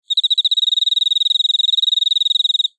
Cricket Chirp 3600Hz
This is a single set of chirps from a cricket at close range ~10 cm.